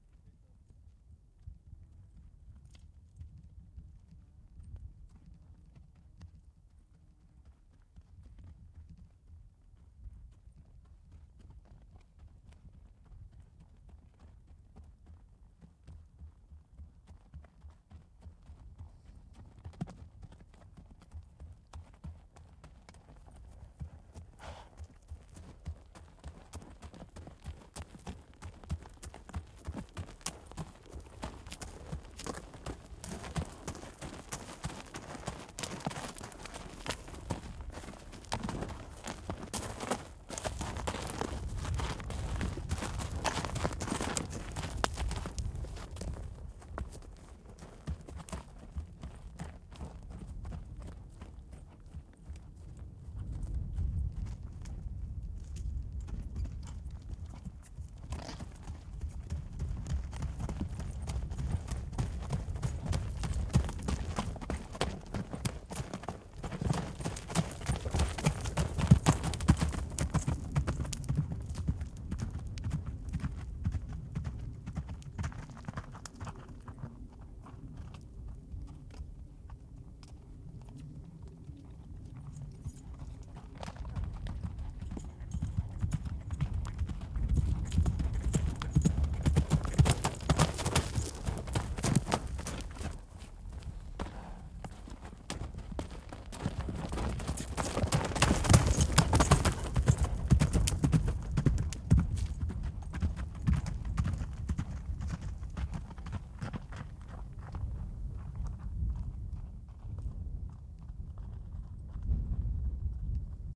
A horse walks towards me, rounds me once, trots around me, then canters faster towards and around me. At last it recedes. Everything happens on gravel.
Recorded with a NTG3 on a MixPre6.